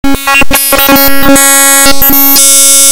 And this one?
A glitch made by loading some files into audacity as VOX and GSM sounds. This one is just an "arp" glitch biscuit.
computer, found-sound, glitch, lo-fi, loud, noise, noisy